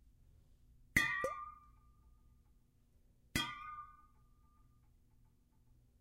Water Bottle Boing
a metal water bottle partially filled then hit against a table and swiftly moved in a circular motion to create a "boing" effect
boing, water